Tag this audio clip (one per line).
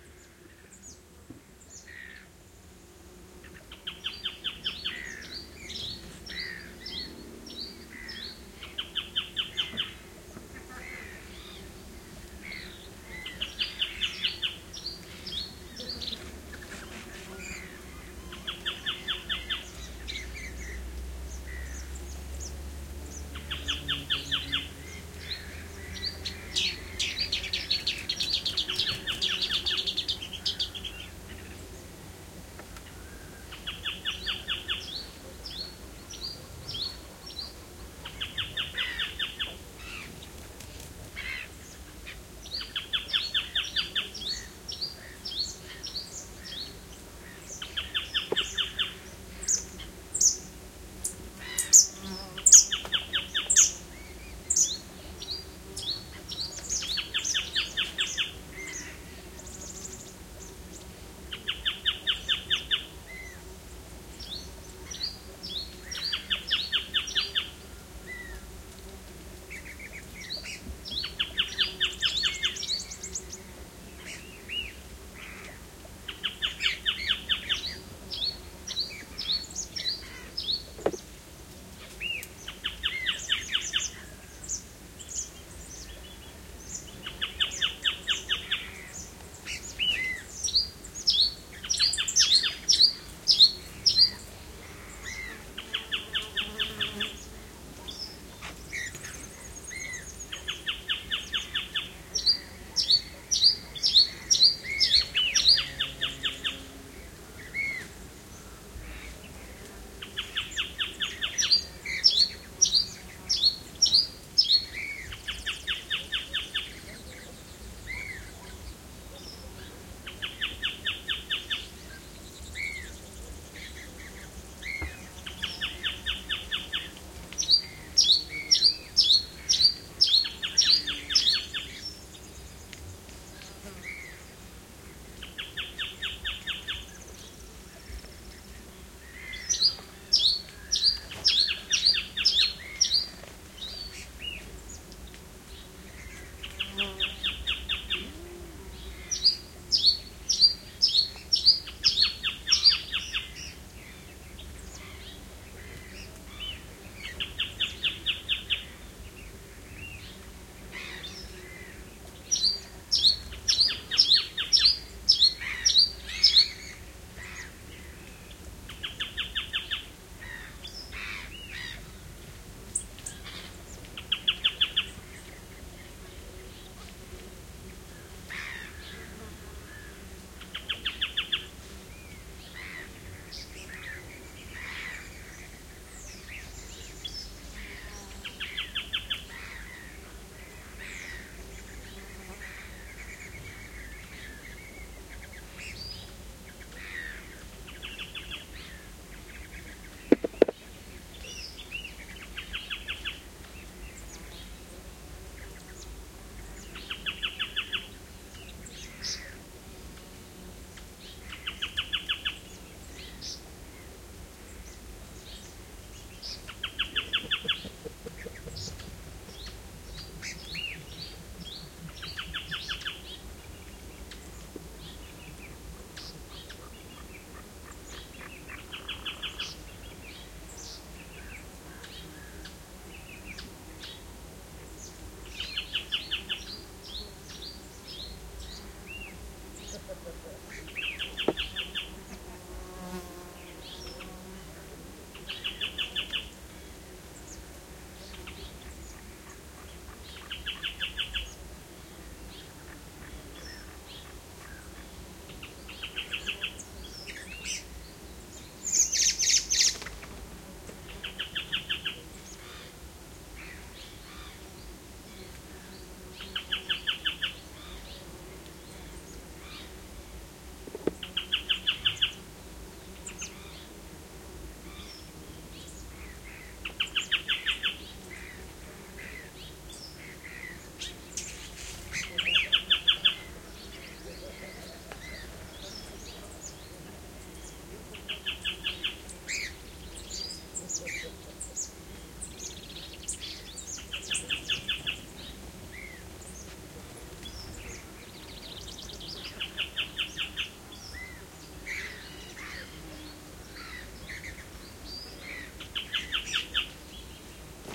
forest
nature
wildlife
birds
spring
insects
chirps
field-recording
usi-pro
chiloe